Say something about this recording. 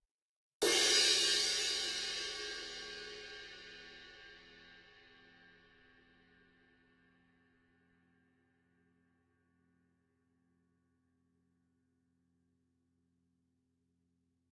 Zildjian 19" K Dark Crash Medium Thin Softer Hit 1990 Year Cymbal
Zildjian 19" K Dark Crash Medium Thin Softer Hit
Thin, 19, Medium, K, Zildjian, Crash, Dark, Softer, Hit